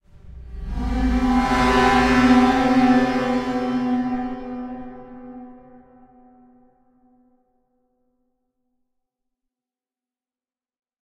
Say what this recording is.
Horror Cinema 4 2014
Recorded using a detuned violin and processed through Absynth 5 with additional basic audio effects.
Ambient, Atmosphere, Cinematic, Creepy, Dark, Detuned, Film, Horror, Spooky, Violin